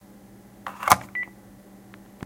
Hang Up Phone
A raw audio recording of a phone being hung up. Includes beep sound.
telephone, home-phone, phone, hang-up